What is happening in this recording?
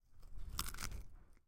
Human Crunch
Eating, foley, human, crunch